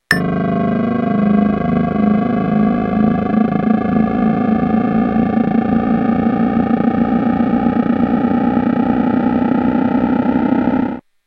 cool casio sk-1 effect when you hit the loop set button after pressing and holding a key... vibrato should be on and use a sample (no preset)
casio, loop, sk1, sound-effect, vibrato